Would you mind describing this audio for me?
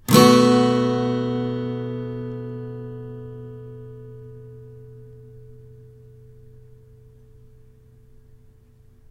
More chords recorded with Behringer B1 mic through UBBO2 in my noisy "dining room". File name indicates pitch and chord.
acoustic, chord, guitar, major, multisample, yamaha